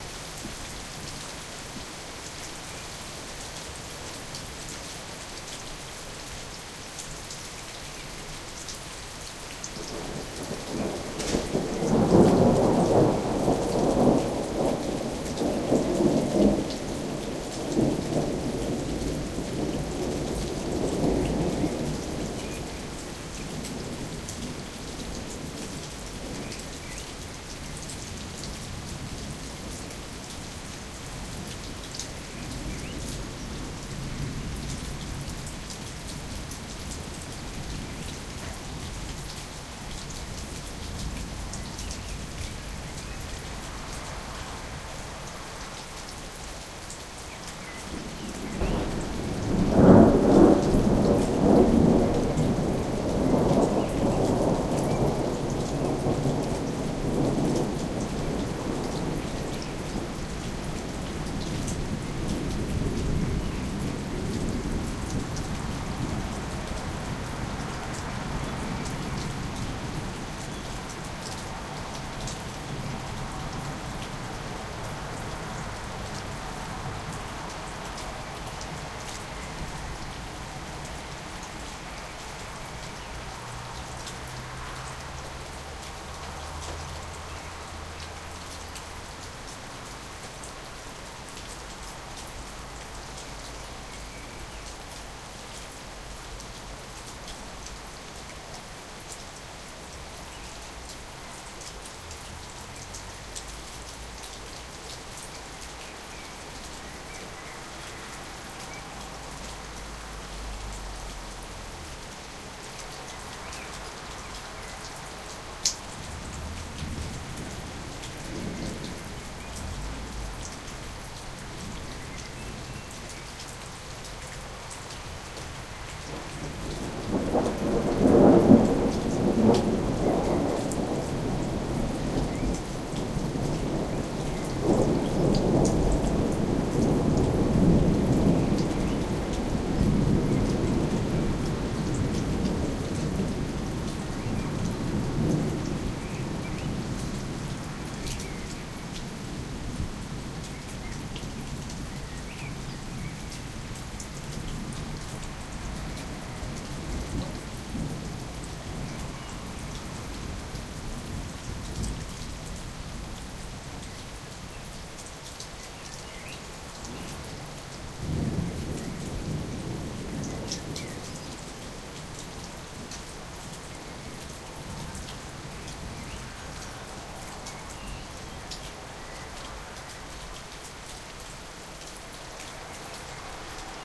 rain and thunder 2
Thunderstorm in the countryside near Lyon (France). Recorded with a Zoom H2, edited in Ableton Live 8.
field-recording, nature, rain, storm, thunder, thunderstorm, weather